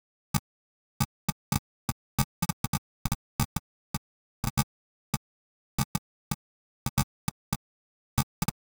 Digital glitch sound I made using white noise, reaper and some effects